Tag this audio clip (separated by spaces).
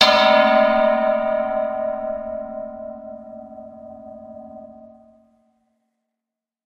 horror horror-effects sinister